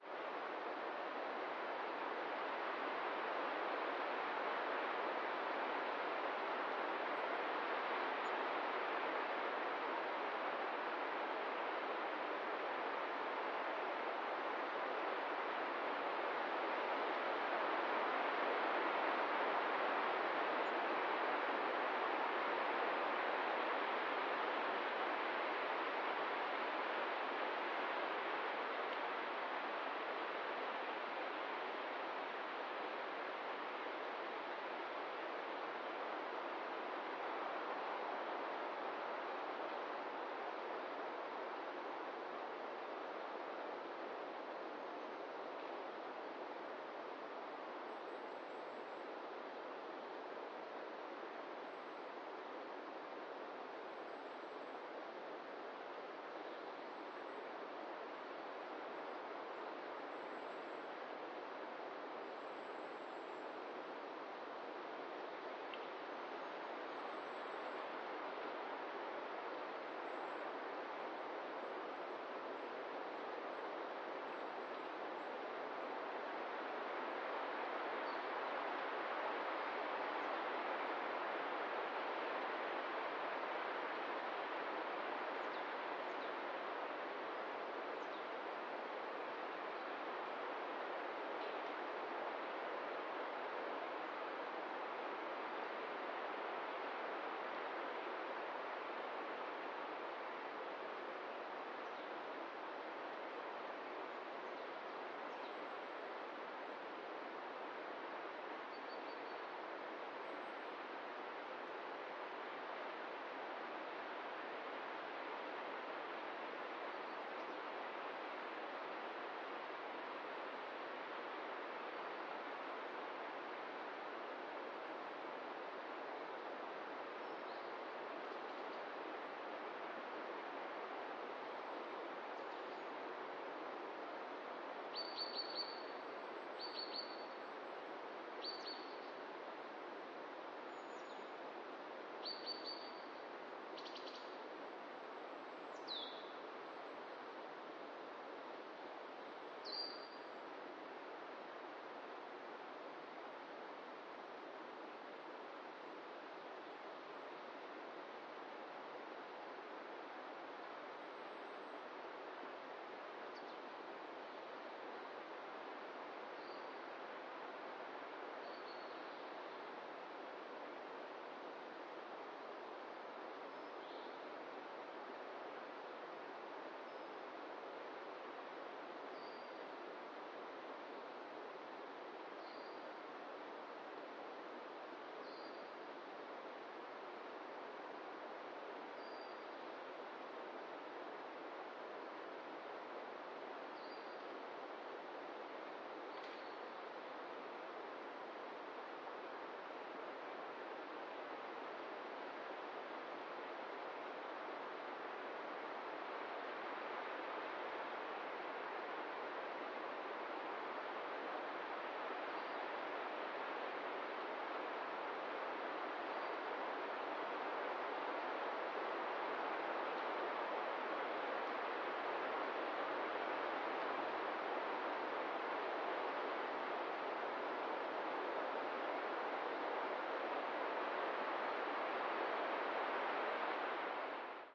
This soundscape was recorded on sunday the first december 2013 at the Einemhofer Forst (forest) in district Lüneburg (Lower Saxony, Germany). It was a characteristic cloudy and windy autumn morning. At 2:14 is a nice little bird song.
It was recorded with Zoom H6 and it's XY microphone and Sound Forge Pro was used for editing.